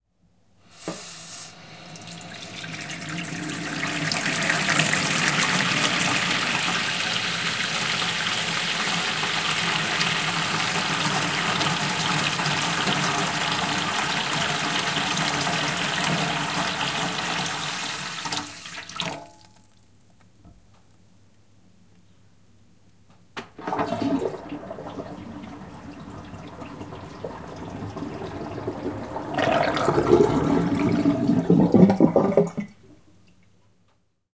Filling and Emptying Kitchen Sink (metal)
Recorded using Zoom H4N Stereo internal mics with 115hz cut. Tap was turned on and of and then the plug removed from the sink which was a metal kitchen model.
Normalized to 0db